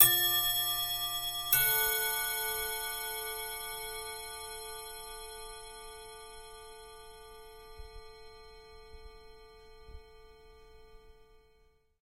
old clock bell ringing
bell, clock, clocks, grandfather-clock, pendulum, tac, tic, time, wall-clock